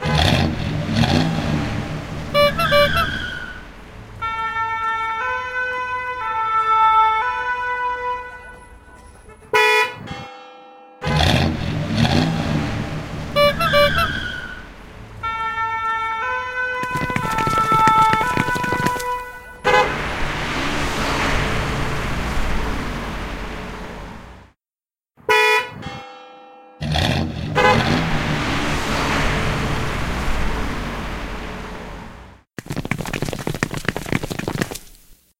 sanic boy
steps, foot, horn, bike, sreech